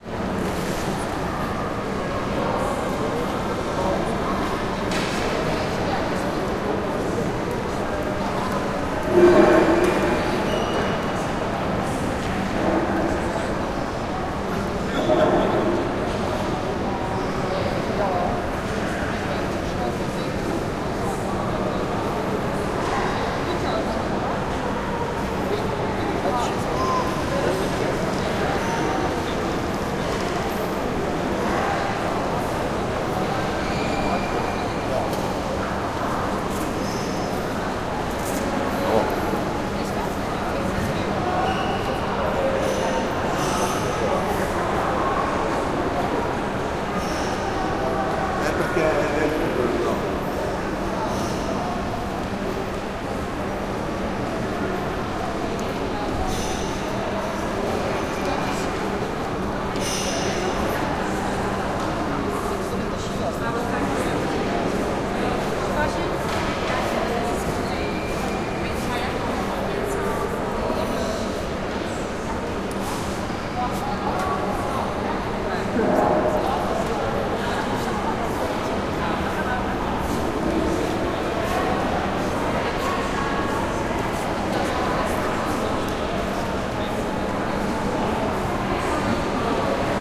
fiumicino airport
Ambience of hall in Terminal 2, Fiumicino - Leonardo da Vinci International Airport, Italy.
airport, terminal-2, fiumicino, hall, indoor, tascam, ambience, italy, dr-100